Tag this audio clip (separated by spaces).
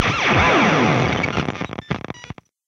virtual-amp glitch amplifier experimental Revalver-III amp-modelling arifact noise amp-VST